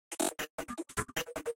1 bar percussion glitch